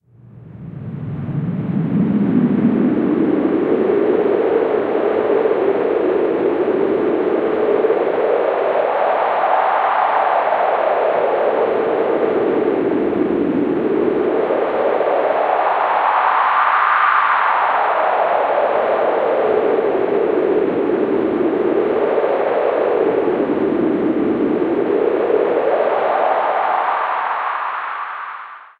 Cold howling wind suitable for artic or winter landscape